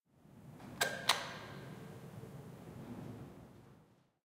LIGHTSWITCH IN HALLWAY WIND - LIM1
Turning on the light switch in an old very reverberated staircase/hallway.
Zoom H1 and mastered with supreme analog gear.
hallway, lamp, light, light-switch, reverb, switch-on